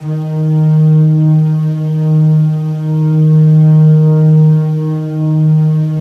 06-flutepad TMc
chorused stereo flute pad multisample in 4ths, aimee on flute, josh recording, tom looping / editing / mushing up with softsynth
pad, 2, stereo, flute, d, swirly